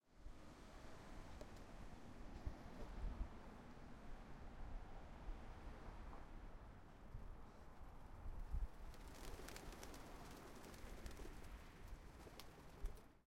front ST NYC pigeons up in city amb
New-York, pigeons, quad, surround, traffic